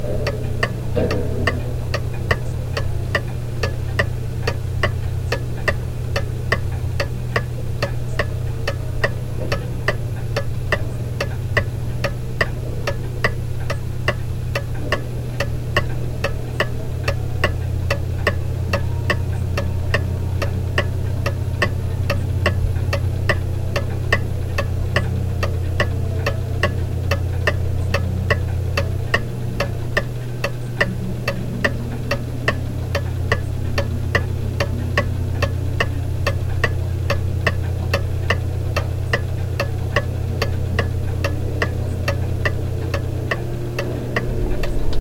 Pendel-Uhr
Ticking of an old clock
Clock, Sound-effect, Ticking